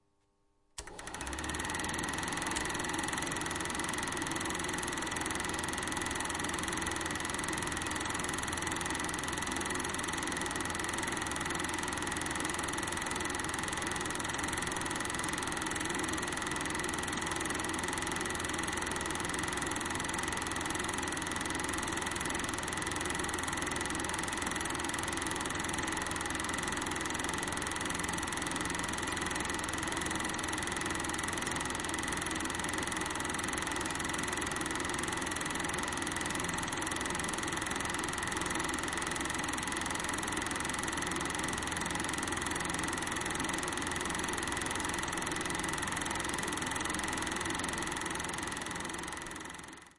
Super 8 mm start projector 50sec
Sound recording of a real super8 mm projector starting, turning it on and letting it run for 50 seconds
8mm, cinema, film, projecting, reel, rhythm, s8, silent-film, vintage